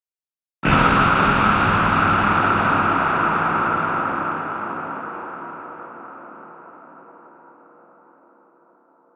electronic synth made with Massive by Voodoom Production
nasty, electronic, synth